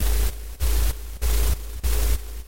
generated white noise using CoolEdit. a rythmic pulse applied (by negatively amplifying at regular intervals).
synthetic, noise, white, rhythm